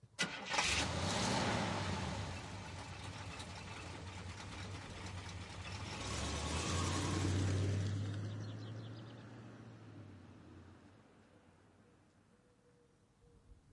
CADILLAC START
A Cadillac Coupe Deville 1966 start and run away
Stereo VAW 44.1/16 take by 2 mics AKG SE 300 cardio on Wavelab
No normalisation